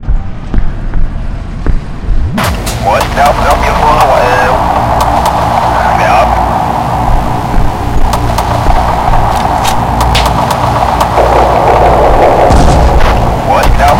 war zone battle clip sample by kris
army, attack, bomb, dramatic, explosion, fight, military, music, punching, radio, shooting, shot, tank, war